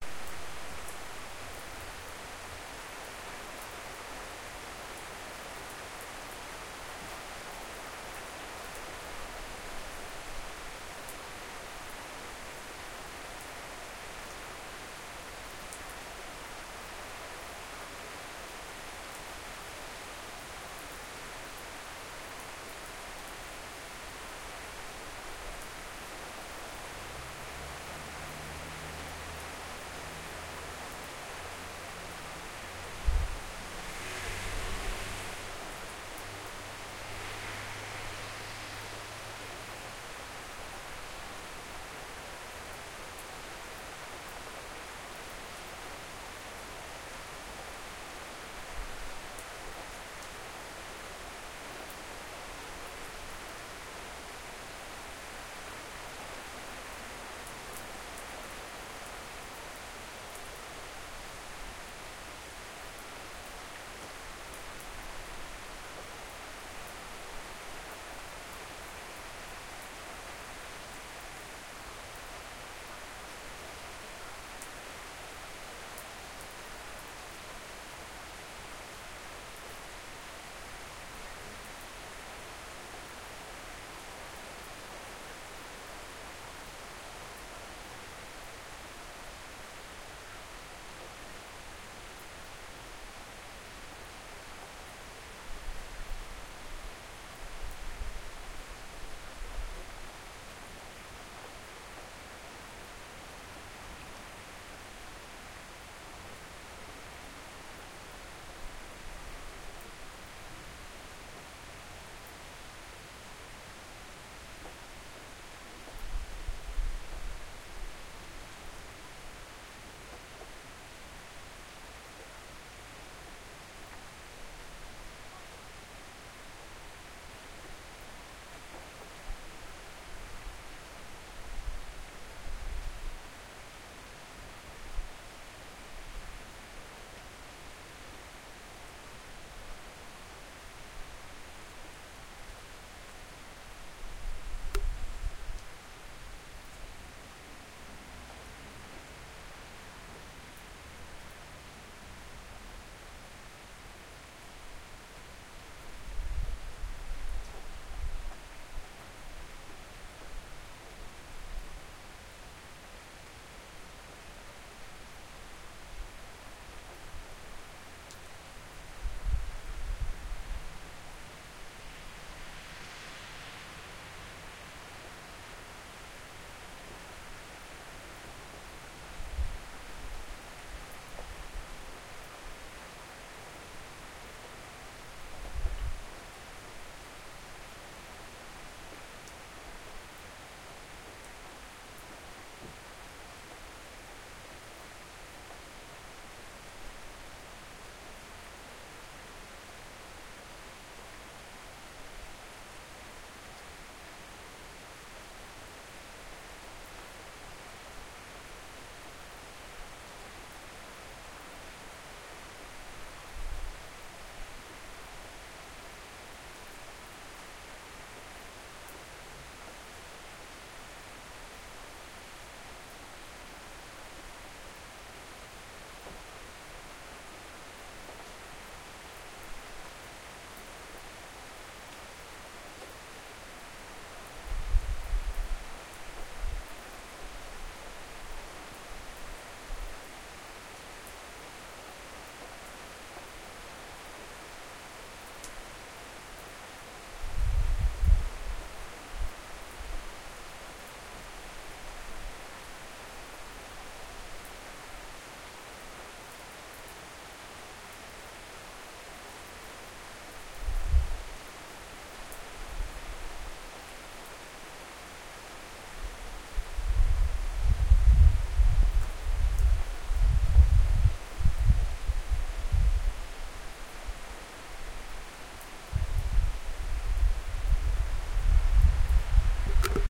summer rain in switzerland
summer, switzerland, rain